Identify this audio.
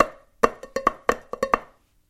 Stomping & playing on various pots
0, egoless, natural, playing, pot, rhytm, sounds, stomps, various, vol